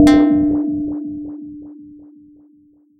MACHADO JOANNA 2018 2019 GONG
I generated two risset drum track with different frequence decay and amplitude. I add a pluck radial effect. On the first track i add a strong reverberation effect to change all the song. I accelerated the second track. And then i create a gong noise ringtone